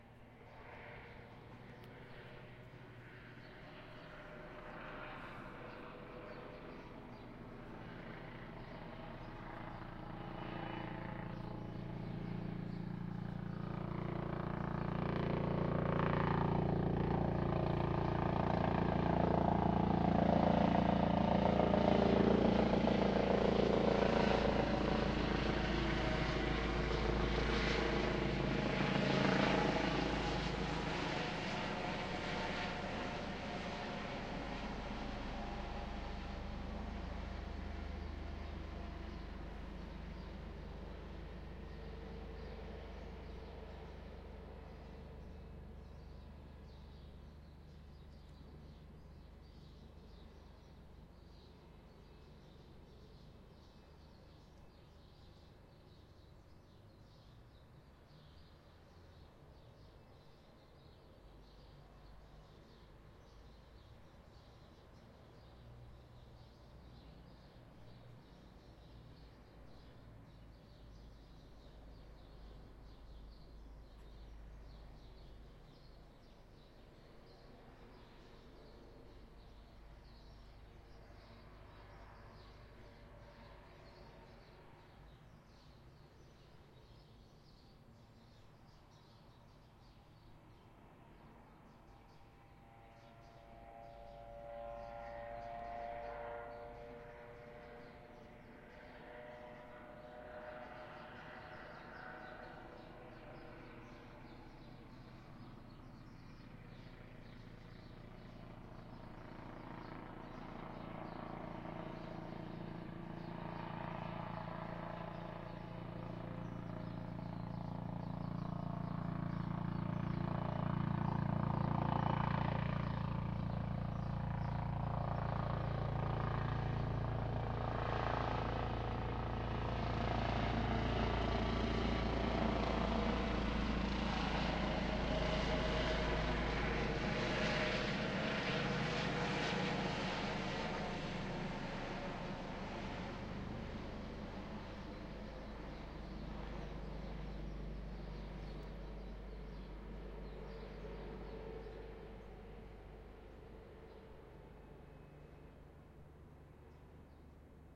POLICE COPTER OVERHEAD CIRCLES
The same old police helicopter as the other two files - this one circles around for a bit. Please note they weren't looking for me.
police, overhead